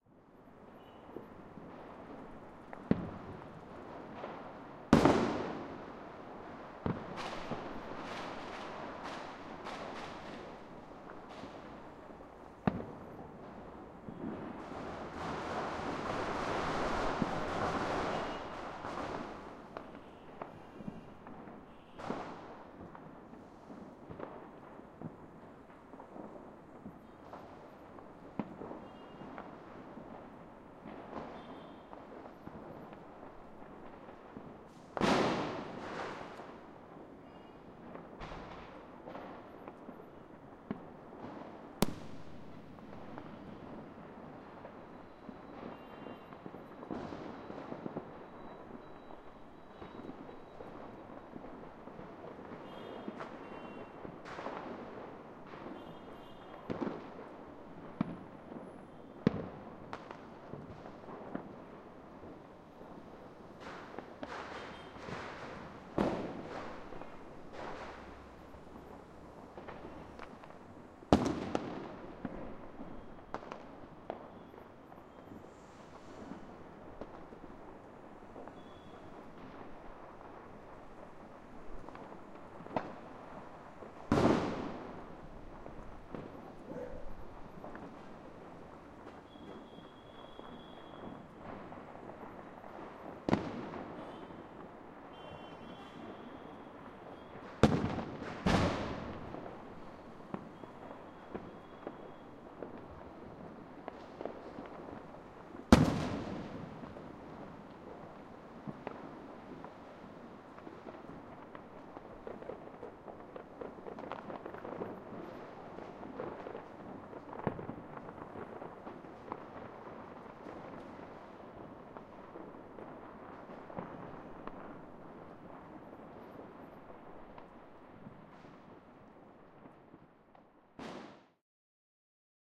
This is on a November night in Bangalore. Recorded from an apartment terrace on a Tascam DR 100. It's Diwali, the festival of light, and you can hear lots of fireworks going off everywhere. Unprocessed, so lots of dynamics at low levels.